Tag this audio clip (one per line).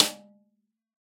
1-shot
drum
multisample
snare
velocity